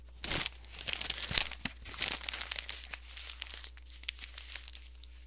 Paper Crumple 2
Me crumpling up the piece of paper from Paper Rip 2.
crumple, crumpling, writing